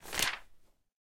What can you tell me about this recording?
Page Turn 24
31/36 of Various Book manipulations... Page turns, Book closes, Page